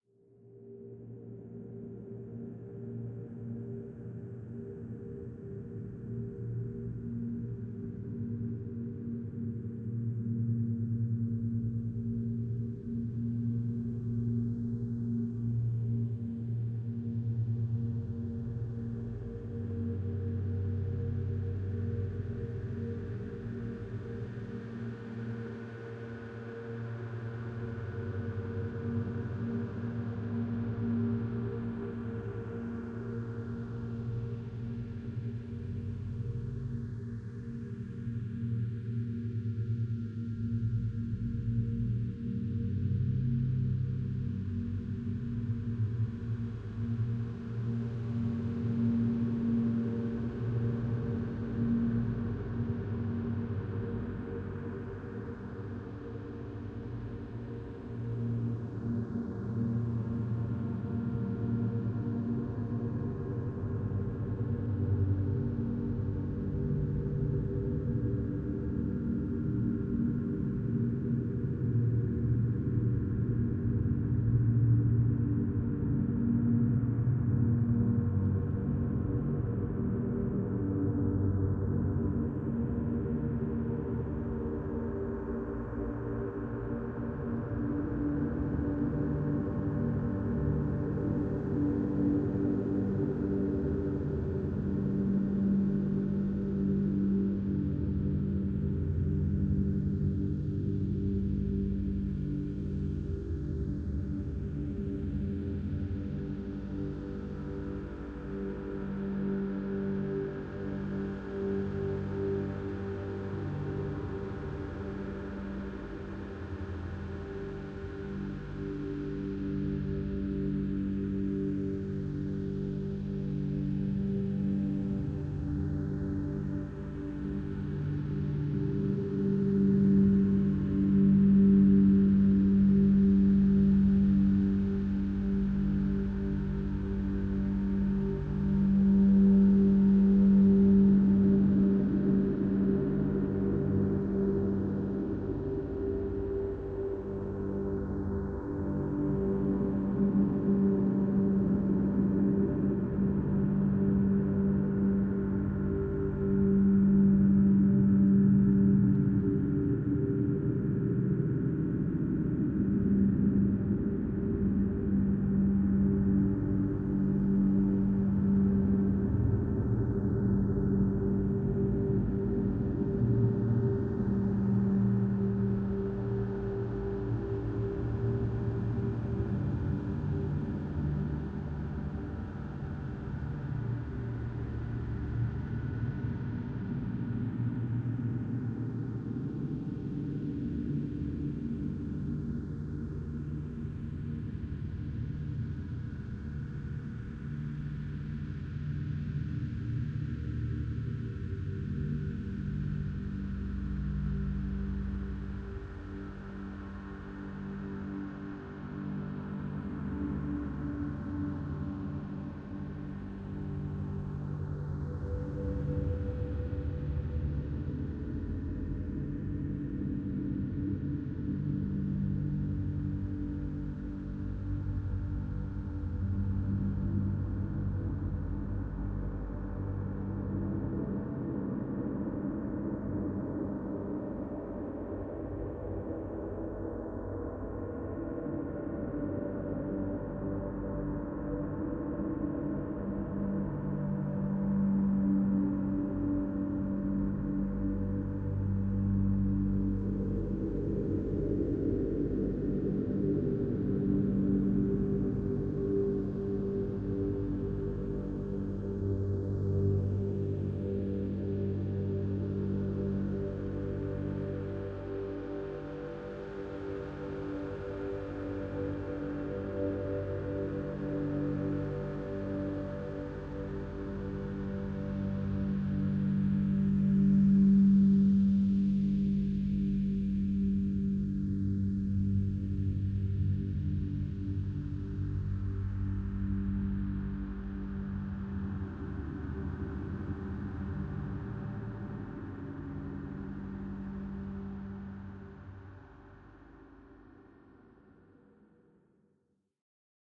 Ambience 04. Part of a collection of synthetic drones and atmospheres.